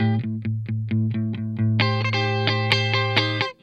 electric guitar certainly not the best sample, by can save your life.